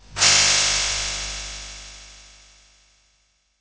Artificial Simulated Space Sound 13
Artificial Simulated Space Sound
Created with Audacity by processing natural ambient sound recordings
ufo, alien, spacecraft, ambient, artificial, atmosphere, experimental, drone, effect, pad, spaceship, soundscape, sci-fi, fx, scifi, space